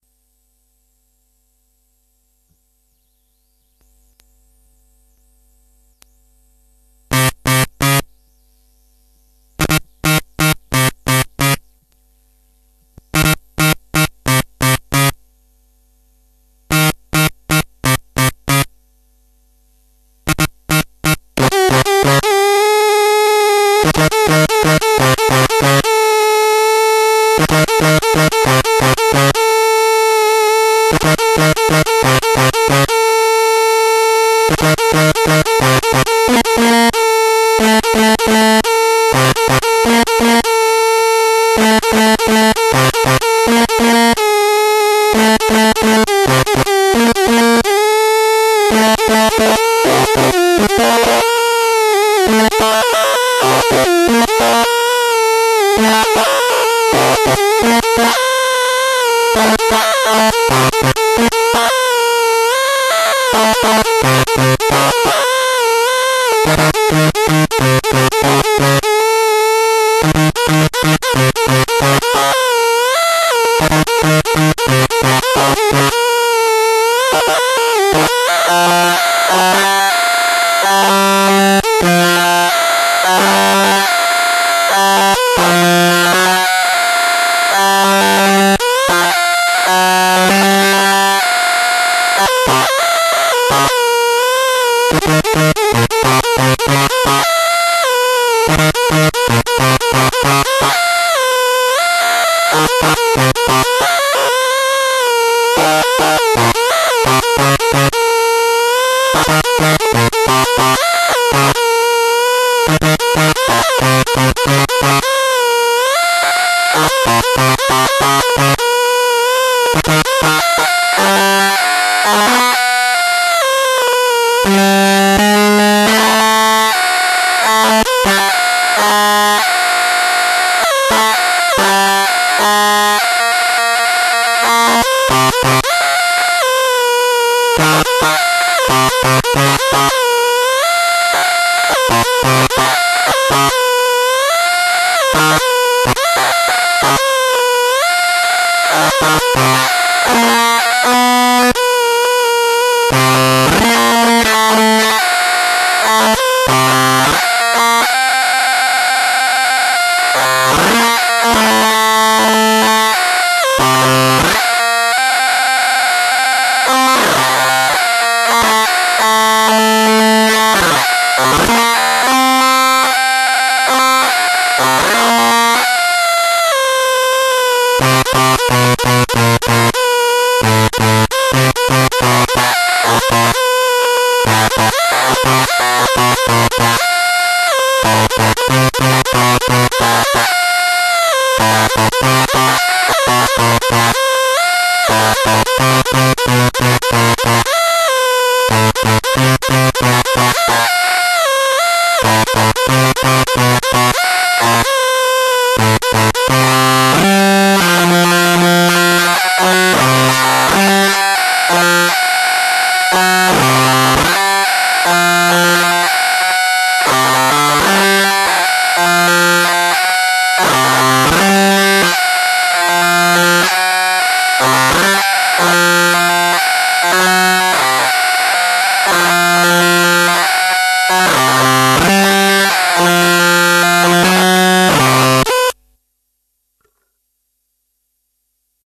When the switch is turned on it creates horrible, distorted, howling glitchy feedback. This sample contains a variety of phrases in C minor at 135 BPM.